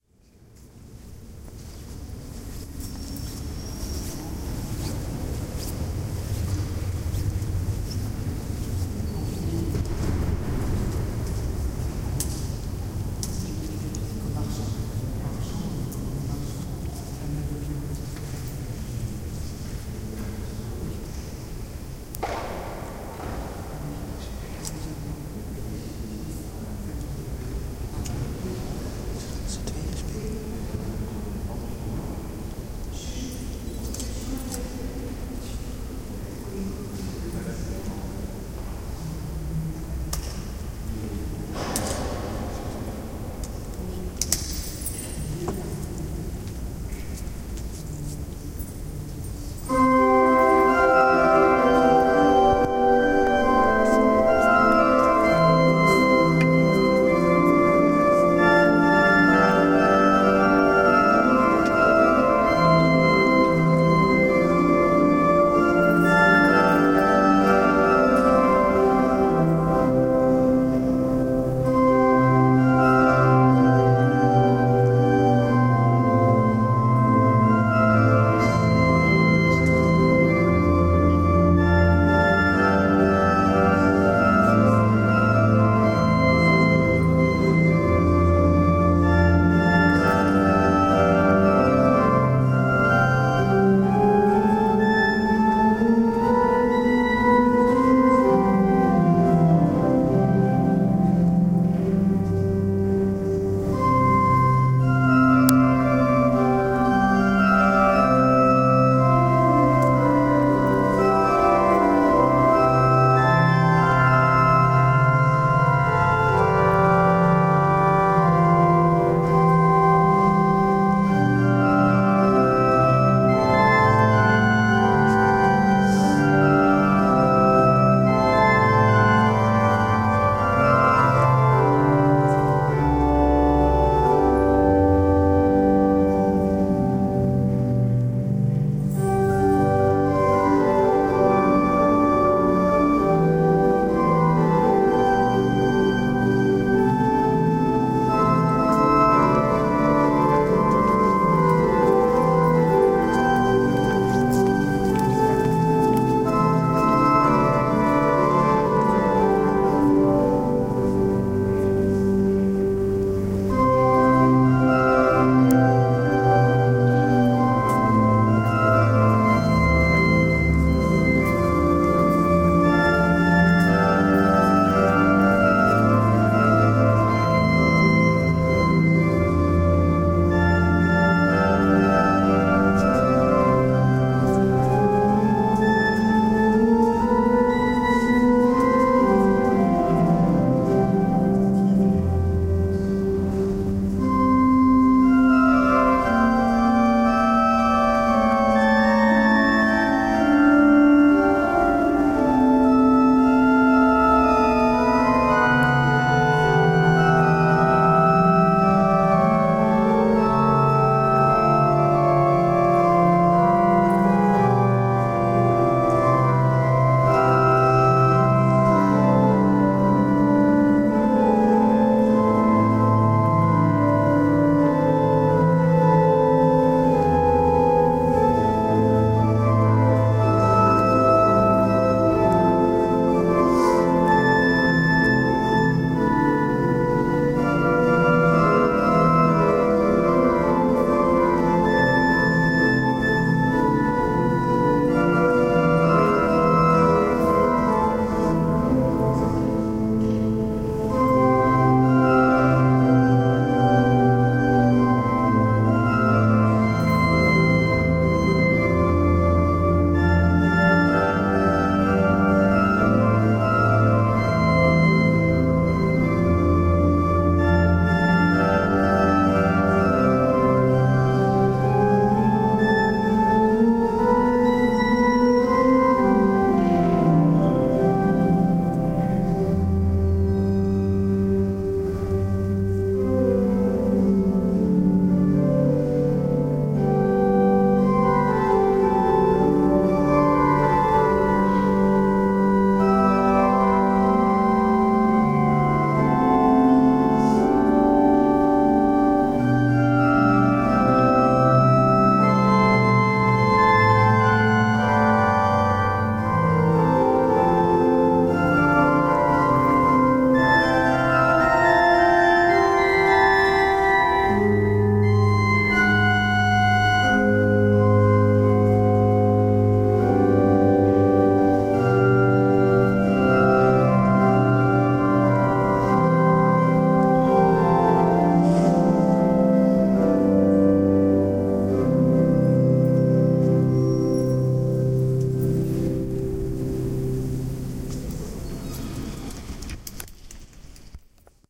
Eglise Angoulème
I was in the church of Angoulème (F) when to my delight organ practise begun. Quality is not extremely good but the ambience is nicely catched imho. Anyone who knows the organ work, I'd like to know!
church, zoom-h2, field-recording, whisper, practise, organ, angouleme, ambience, tourists